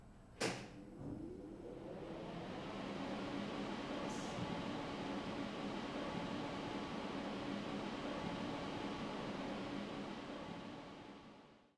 starting-up-device
The sound of a scientific device with vans and lamps is switched on. Power-up sound
van, starting, device, simulator, lamps, switch, science, power, up, buzz, zoom